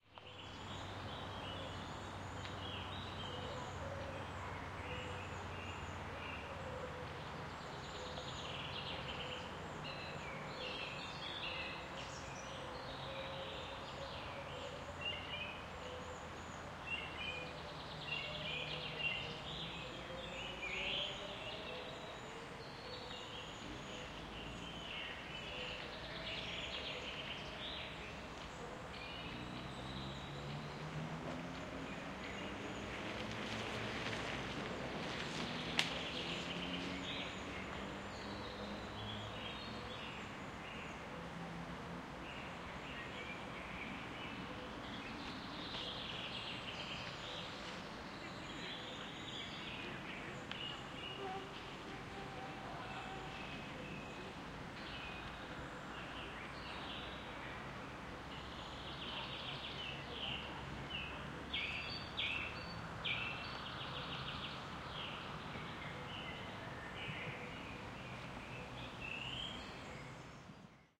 09.06.2013: about 16.00. Poznan in Poland. Lasek Debinski. Ambience of grove almost in the center of Poznan.
Marantz PMD661 MKII + shure VP88 (paramteric equalizer to reduce noise, fade in/out)